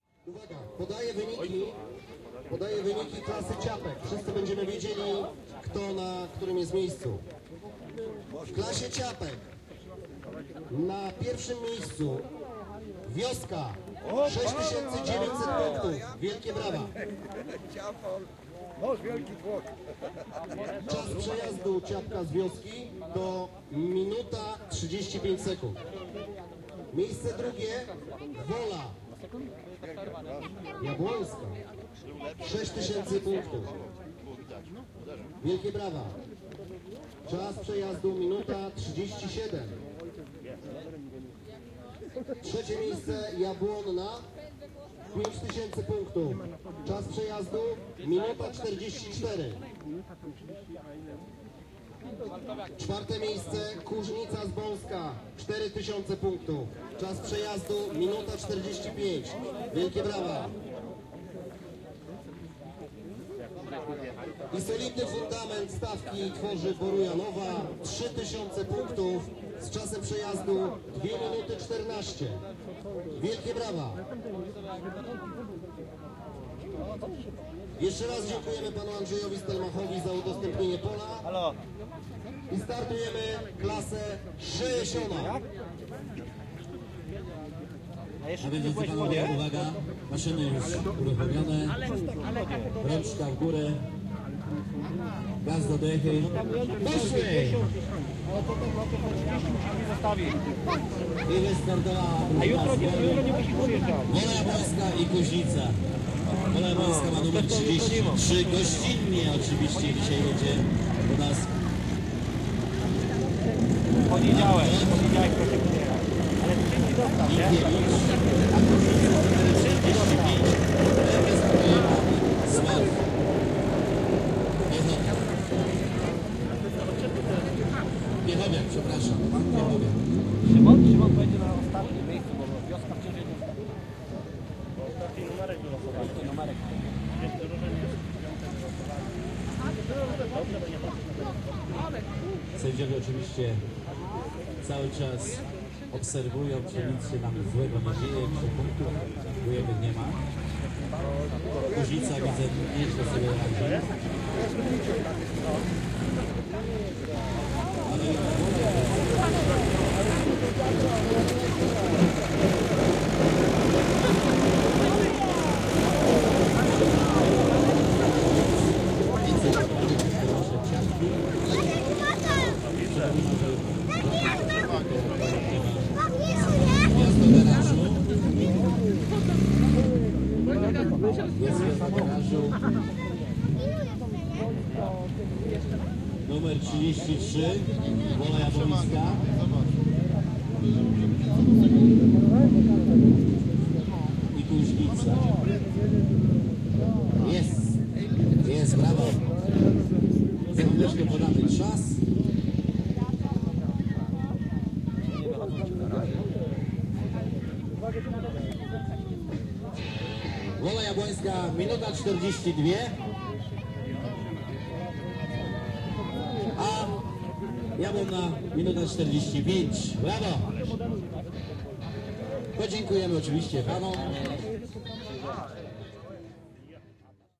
111014 tractor race category 60
the First Majster Trak - race of tractors in Wola Jablonska village (Polad). The event was organized by Pokochaj Wieś Association.
Recorder: marantz pmd661 mkii + shure vp88